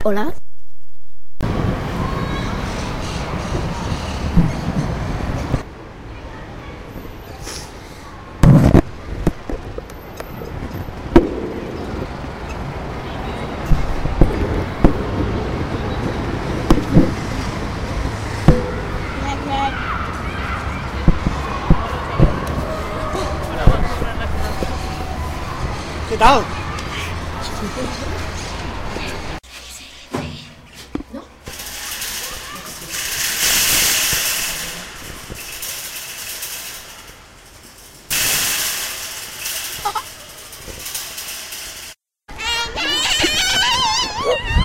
SonicPostcard SASP BernatJoan
6th-grade, santa-anna, sonicpostcard, spain